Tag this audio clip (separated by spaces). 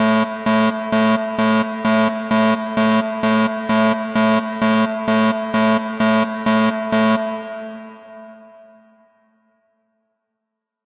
alarm alien bridge electronic emergency engine fiction future futuristic fx hover science sci-fi sound-design space